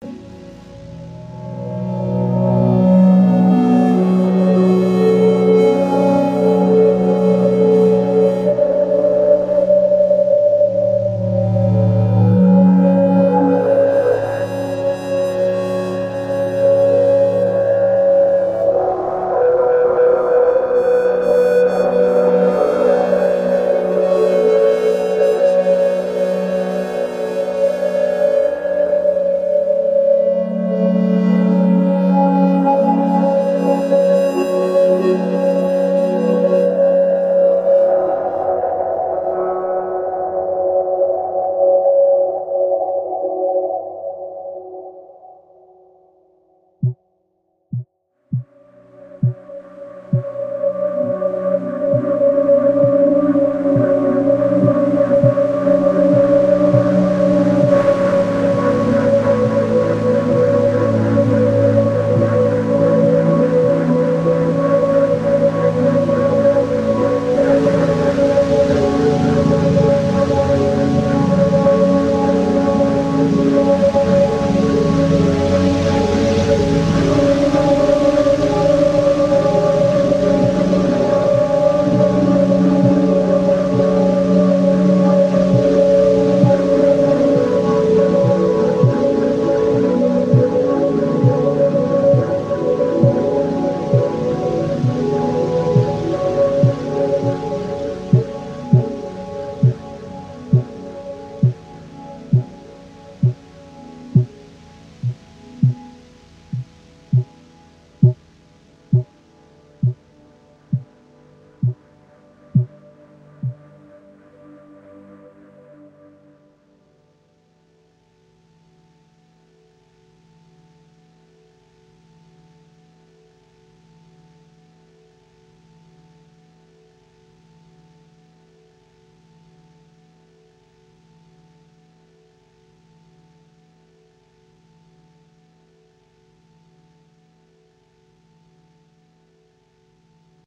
Cyber Ambient Dreamscape
ambient, artificial, atmosphere, cyber, deep, digital, divine, dream, Dreamscape, dreamy, drone, electronic, evolving, experimental, granular, multisample, organ, pad, reaktor, smooth, sound, soundscape, space, sweet, synth, texture, warm, wide